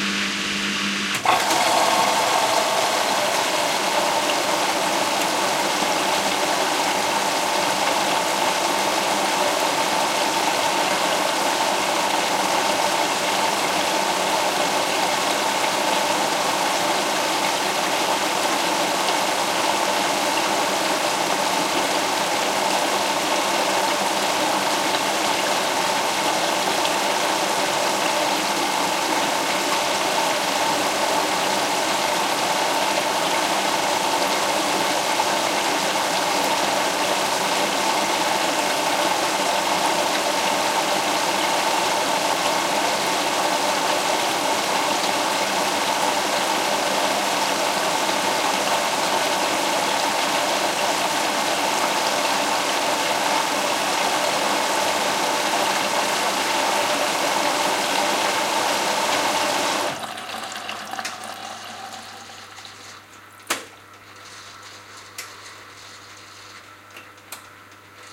noise of a washing machine filling with water. Sennheiser ME66+AKGCK94>shurefp24>iRiverH120, decoded to mid-side stereo